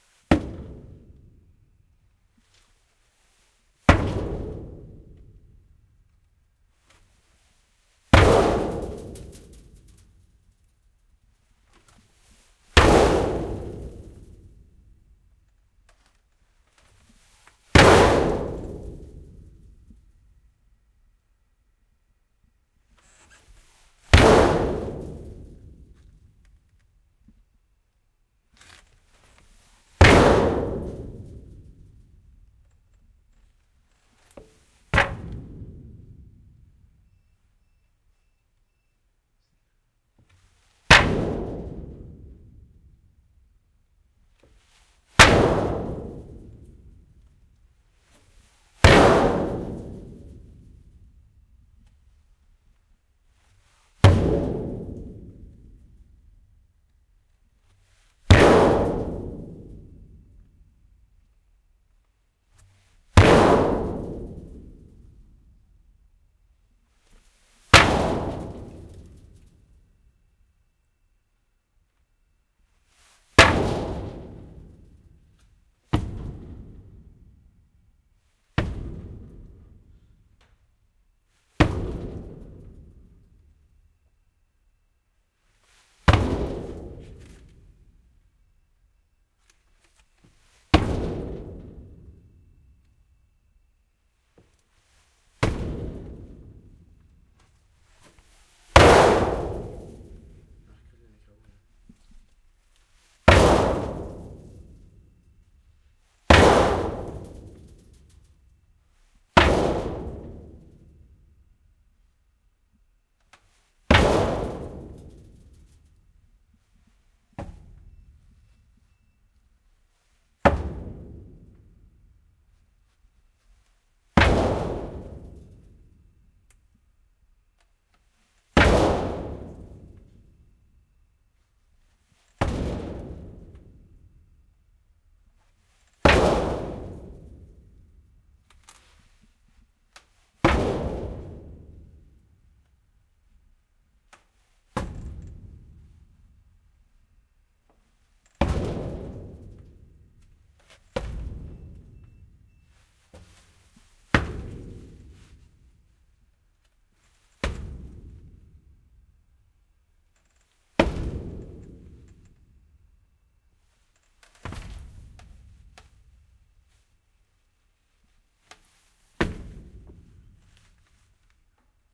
metal thuds kicks resonant
kicks, metal, resonant, thuds